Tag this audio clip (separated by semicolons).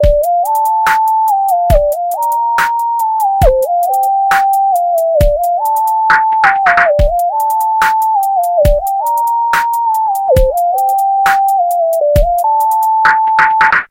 electro; lofi; loop